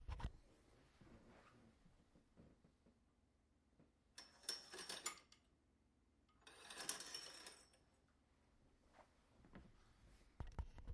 opening closing drapes 2
metal curtain rings on metal rod zoom h1
drapes, opening, window